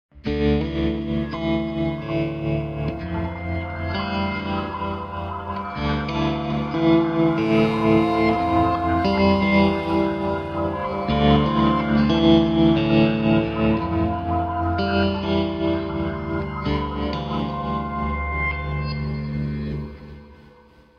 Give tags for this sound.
guitar; ambient

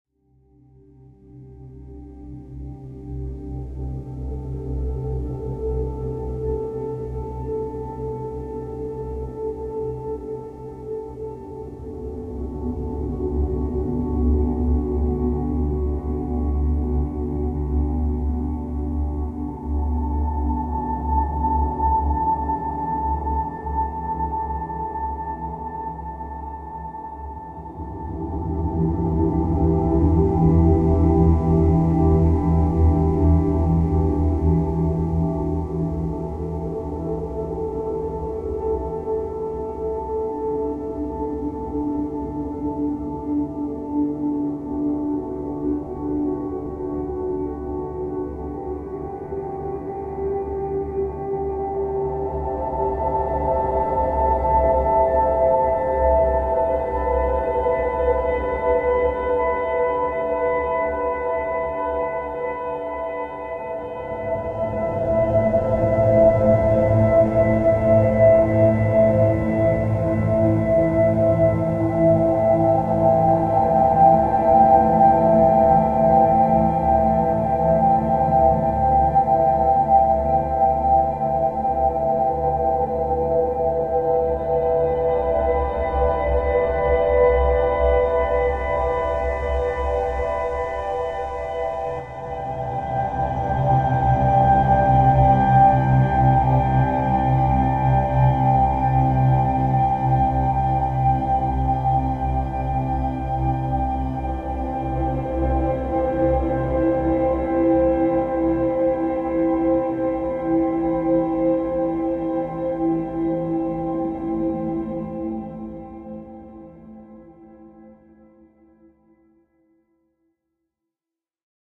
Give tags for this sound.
Ambient; atmosphere; Cinematic; Drone; Drums; Loop; Pad; Piano; Sound-Design

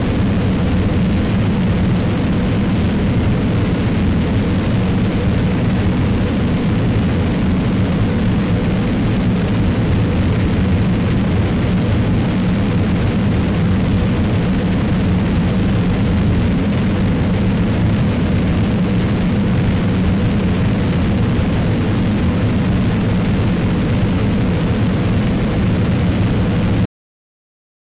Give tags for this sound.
loud; rocket; launch; thruster

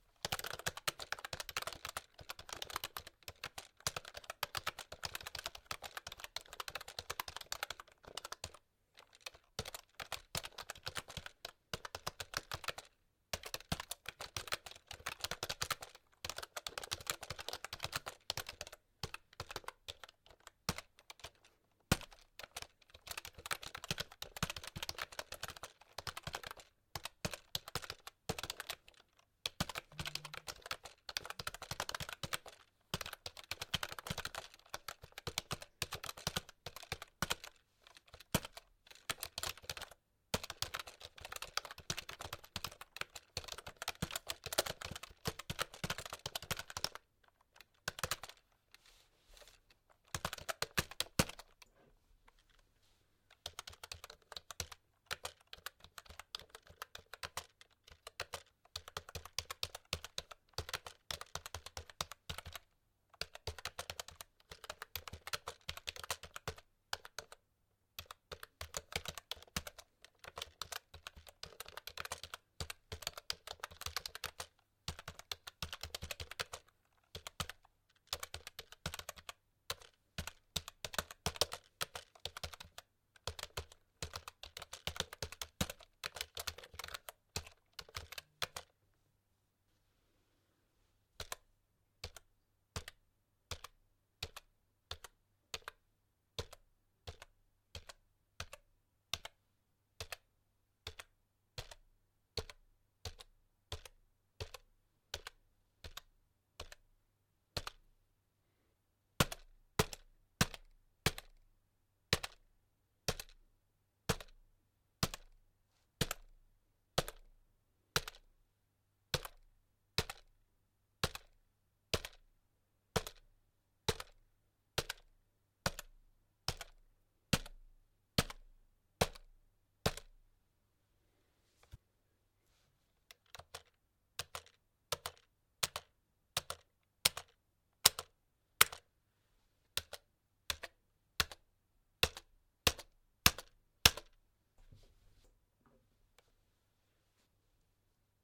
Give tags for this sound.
Buttons; HQ; Keyboard; Typing

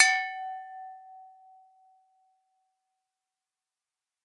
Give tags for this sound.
wine; bell; ping; giant; bottle; toast; big; glass; drink; beer; beerglass; clink; glasses; hit; cheers; clinking

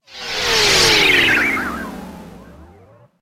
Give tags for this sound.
effect; game; flight; jingle; space

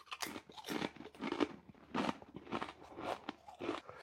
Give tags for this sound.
crunch; eating; food

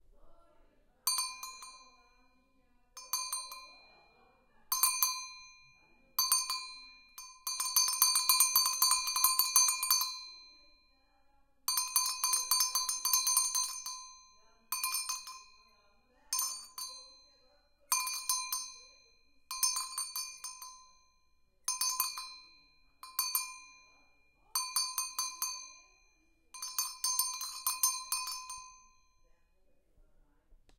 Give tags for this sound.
Polaco; Vaca; Bell; Cow; Bells; Sino; Goat; Carneiro

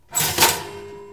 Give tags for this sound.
toast; toaster

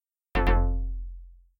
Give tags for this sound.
correct; ding; tone; bell; chime

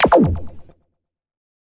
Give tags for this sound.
metallic; percussion; zap

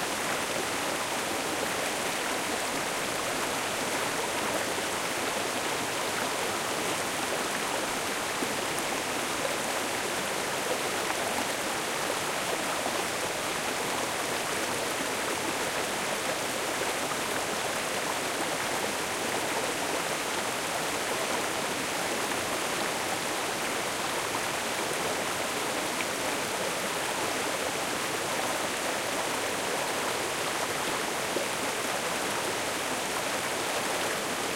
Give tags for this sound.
small; brook; river

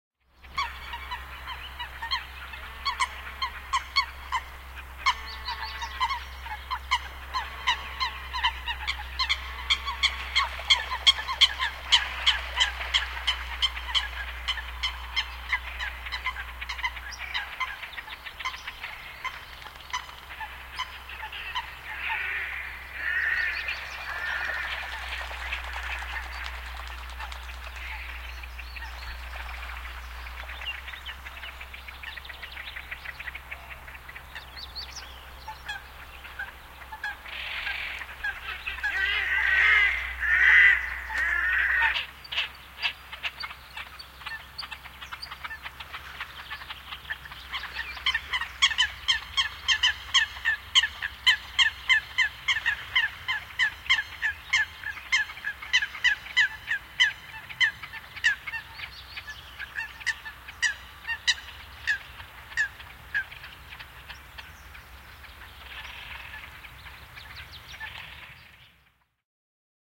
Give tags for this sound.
Soundfx; Field-recording; Tehosteet; Bird; Yleisradio; Finnish-Broadcasting-Company; Yle; Coot; Birds; Lintu; Soidin; Luonto; Suomi; Spring; Water; Linnut; Finland; Vesi; Display; Nokikanat; Coots; Nokikana; Nature

